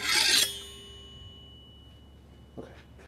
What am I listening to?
Sword Slice 23
Twenty-third recording of sword in large enclosed space slicing through body or against another metal weapon.
foley, slash, slice, sword